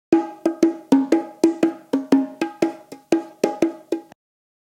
JV bongo loops for ya 1!
Recorded with various dynamic mic (mostly 421 and sm58 with no head basket)
Unorthodox, loops, tribal